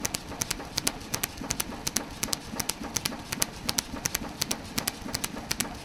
Billeter Klunz 50kg flat belt drive full for looping.